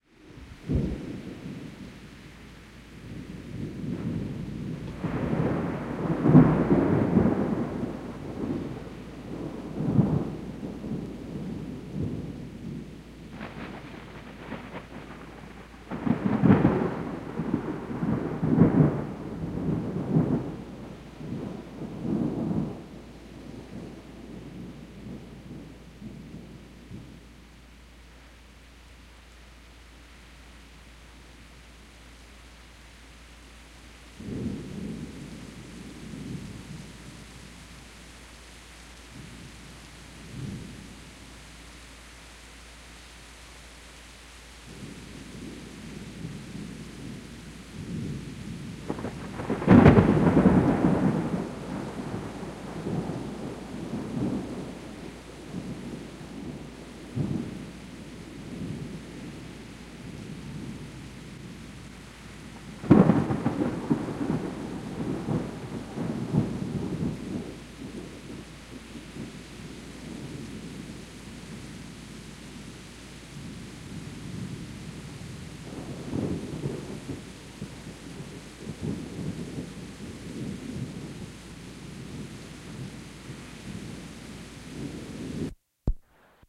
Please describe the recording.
Thunderclaps from a violent thunderstorm.
1:26 - Recorded Spring of 1989 - Danbury CT - EV635 to Tascam Portastudio.
field-recording, soundeffect, thunder, thunderstorm